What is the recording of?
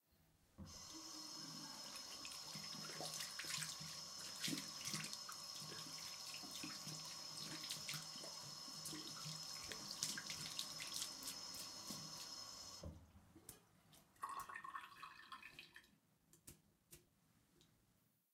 Se lava las manos
Agua, llave, manos